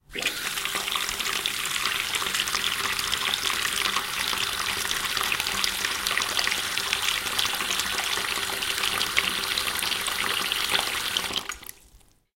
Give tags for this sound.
bucket; faucet; plastic; pour; water

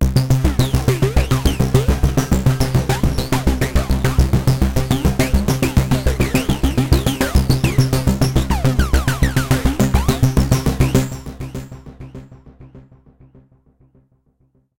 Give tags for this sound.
130bpm; loop

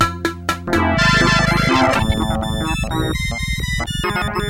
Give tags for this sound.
electro; glitch; static-crush; noise; ambeint; slightly-messed-with; circuit-bent; circuits

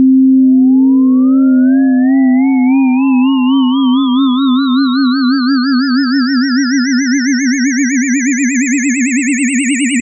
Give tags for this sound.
multisample; mono; ufo; tone

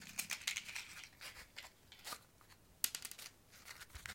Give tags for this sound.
fire; flame; light; match; matchbox; strike

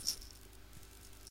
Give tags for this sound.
hit; kitchen; percussion

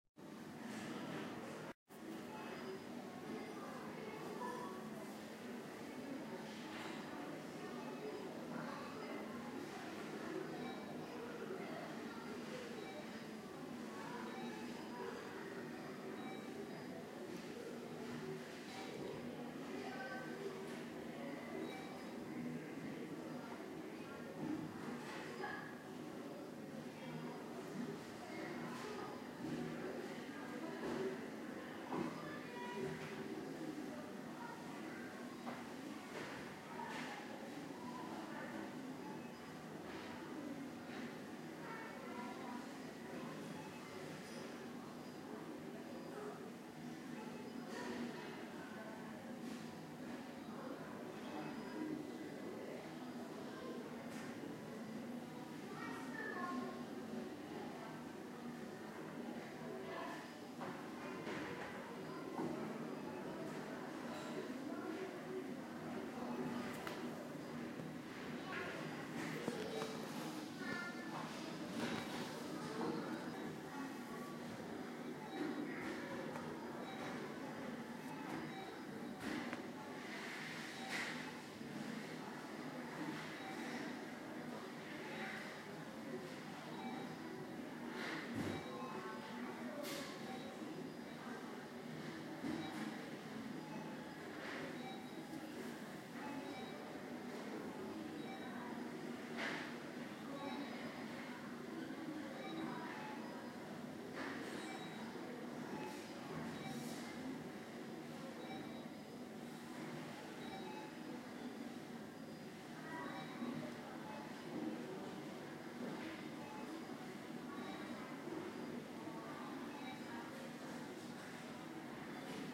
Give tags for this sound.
beep; cashiers; people

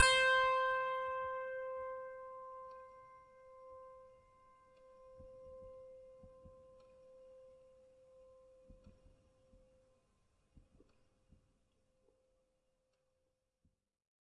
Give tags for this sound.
fingered; strings; piano; multi

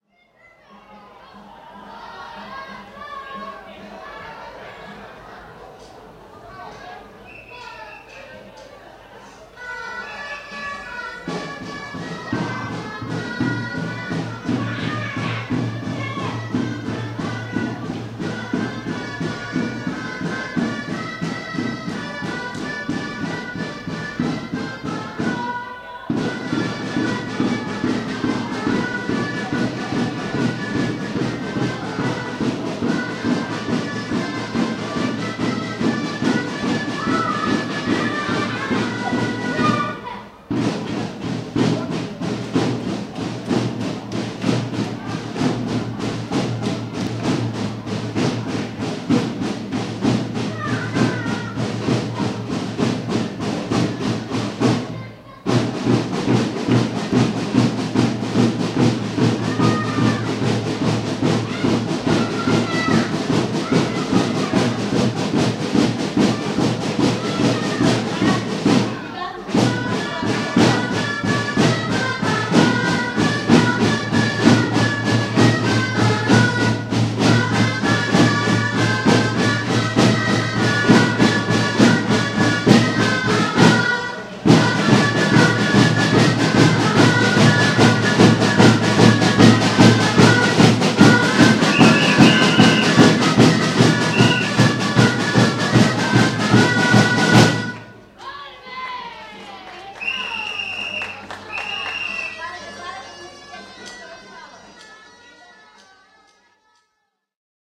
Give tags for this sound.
catalan-folklore; fanfare; street-music

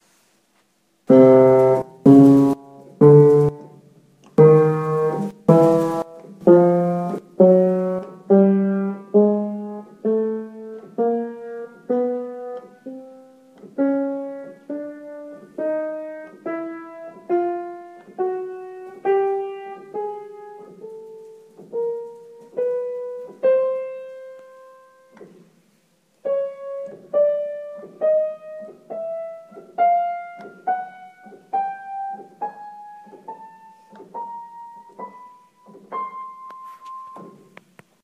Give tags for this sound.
Pitch; Piano; Instrument

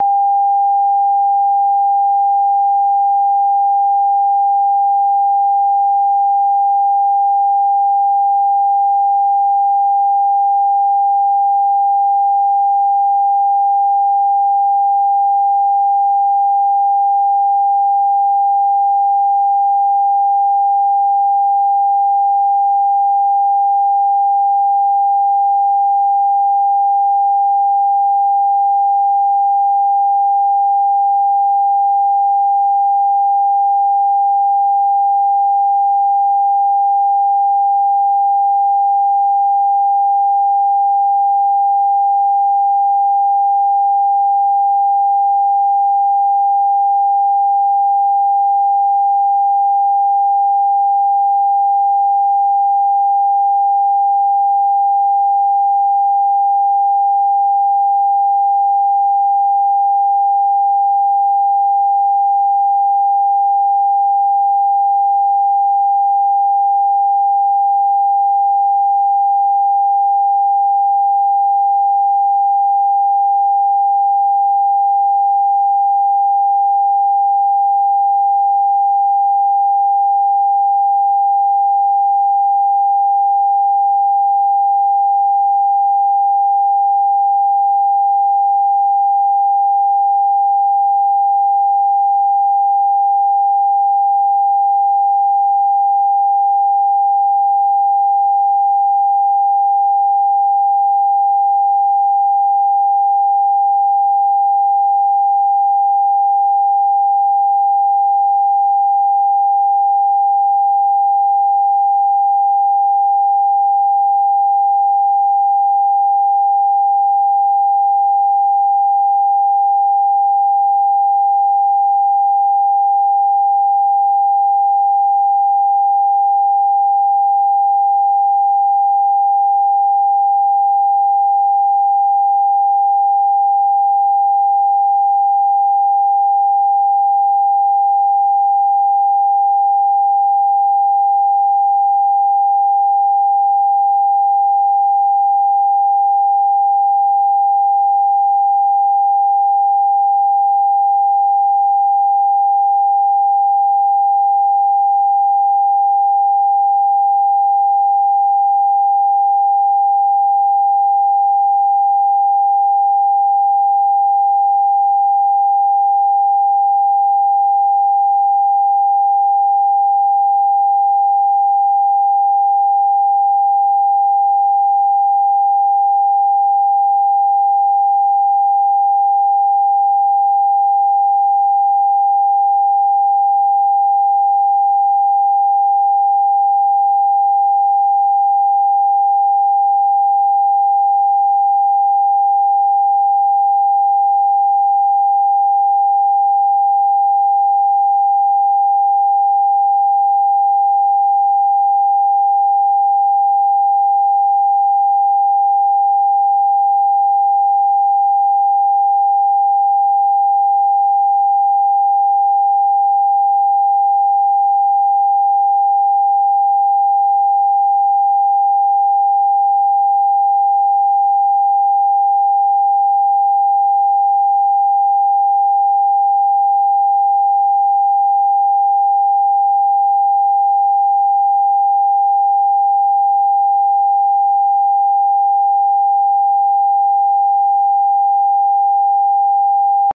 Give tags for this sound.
sound; synthetic; electric

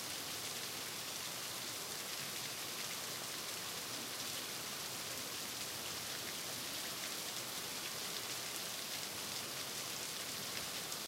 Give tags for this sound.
nature; rain; field-recording; storm

